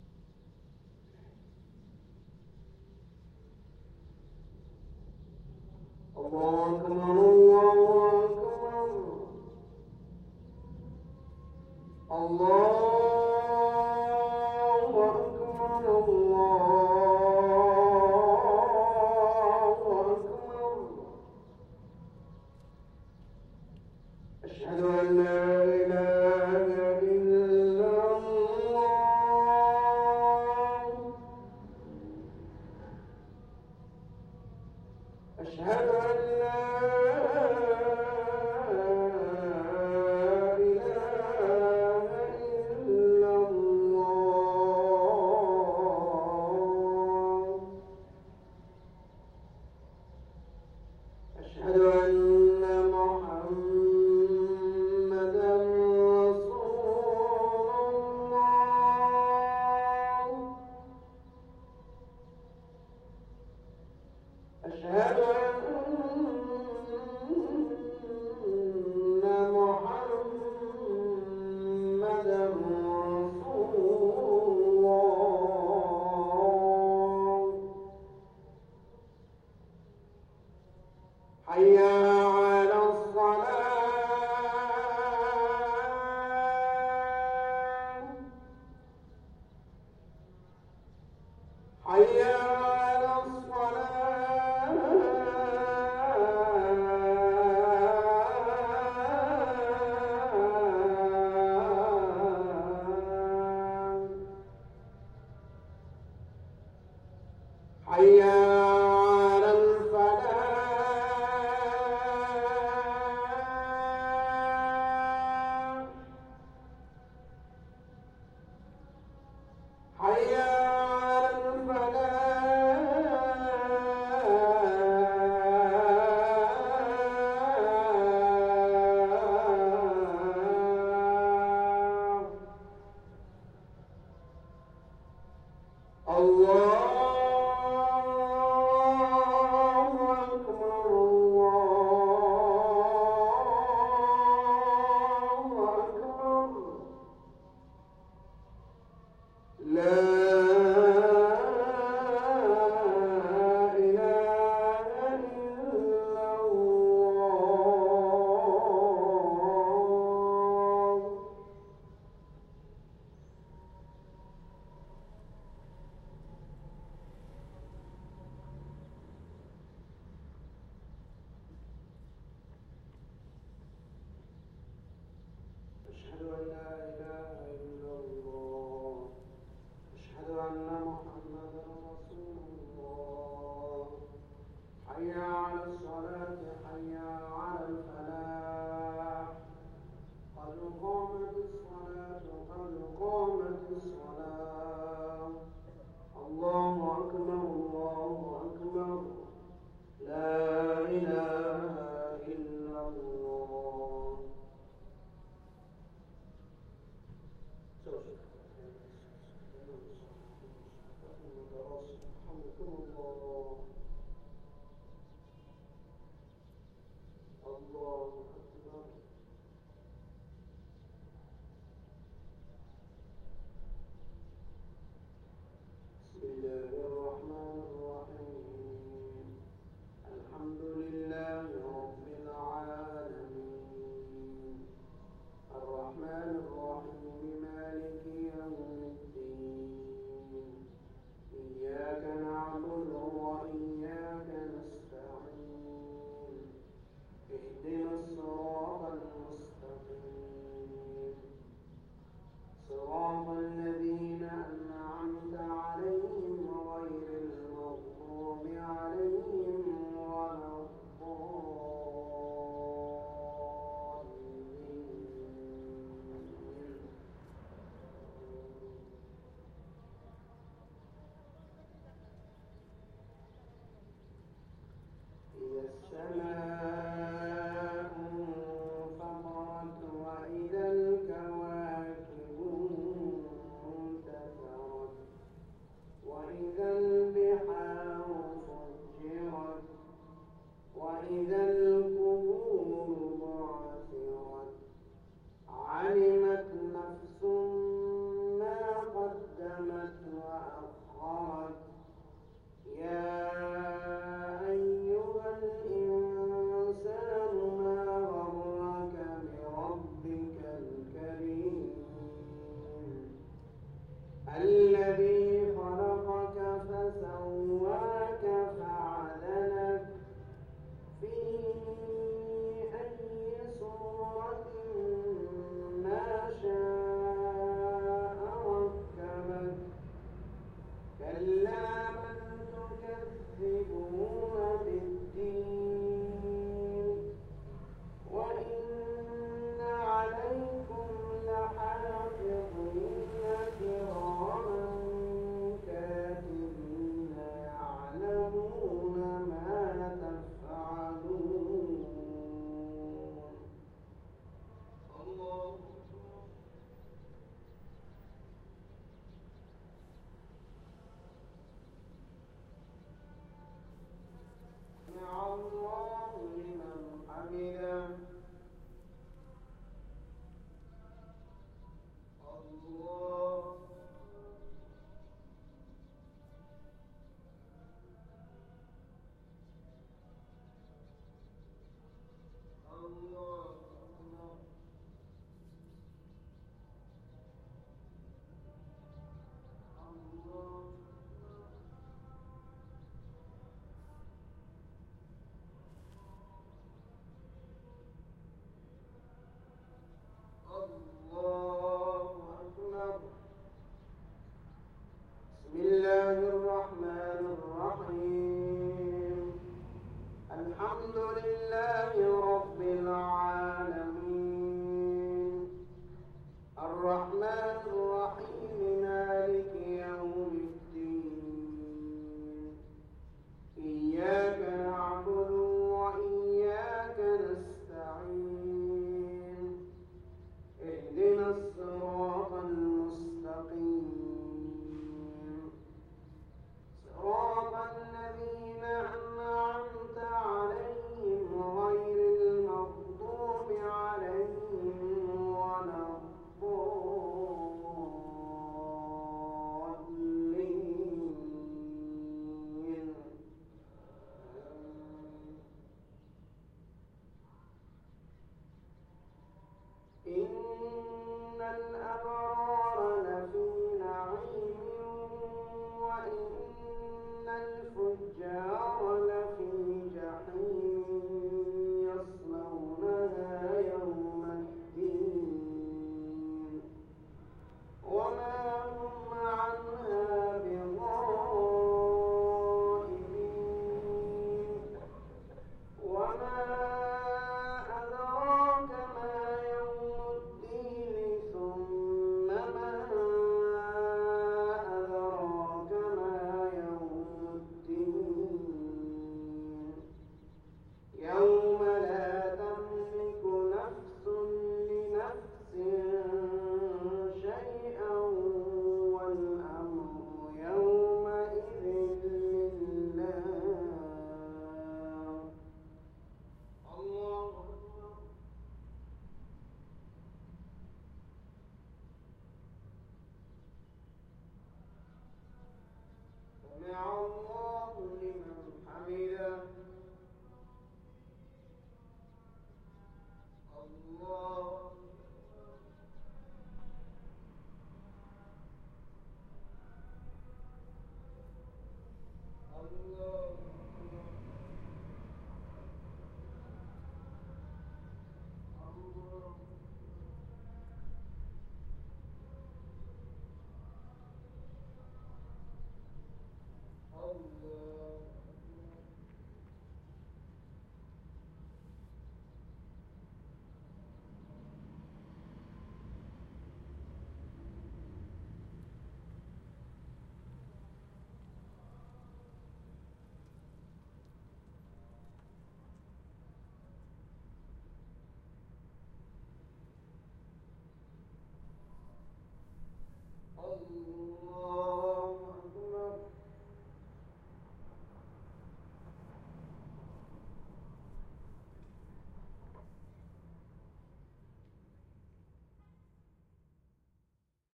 Call to Prayer recorded outside Jumeirah Mosque, Dubai. January 2012